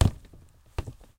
Plastic, jerrycan, percussions, hit, kick, home made, cottage, cellar, wood shed
cottage, home, jerrycan, percussions, Plastic, cellar, hit, wood, made, shed, kick